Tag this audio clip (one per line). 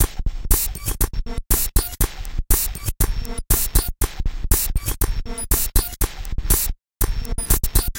game
samples
synth